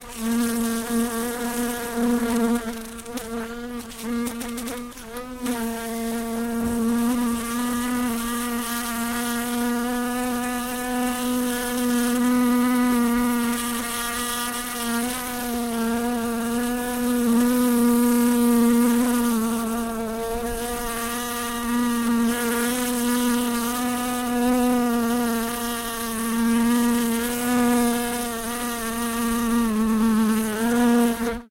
fly noise recorded using Sony MC-907 microphone